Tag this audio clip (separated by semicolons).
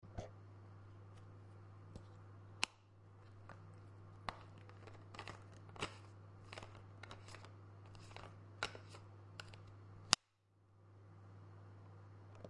bathroom; mouthwash